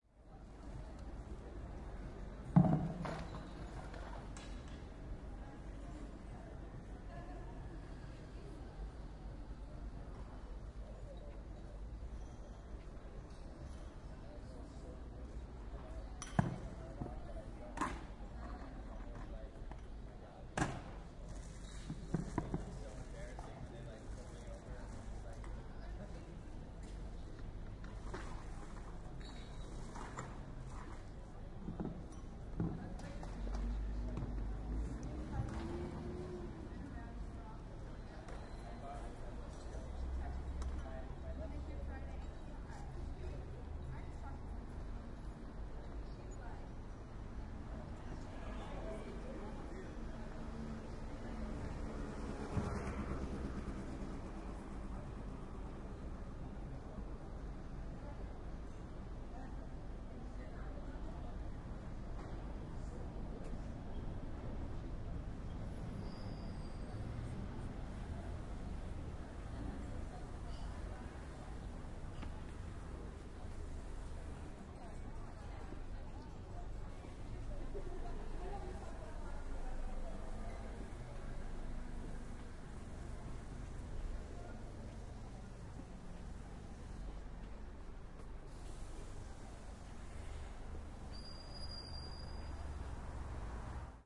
ClosingTime Barcelona
This is a field recording in Avinguda Gaudí in September 2008. It is around 1am and Bars are closing so you can hear the sound of the activities of collecting stuff and cleaning tables. In the background some people talking and some traffic. Recorded with Edirol R-09
bar, barcelona, collecting, people, field-recording, closing